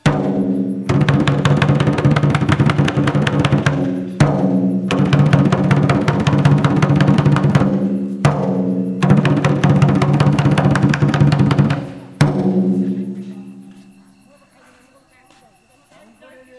Dhol outside
Sound of Ethnic Drum.
Bangladesh, Field-recording